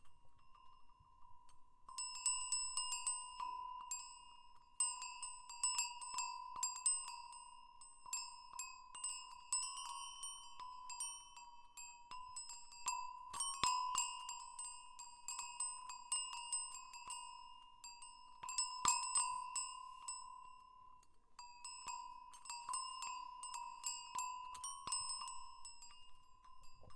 Cowbell, Korea, Bell
Cowbell, Bell, Korea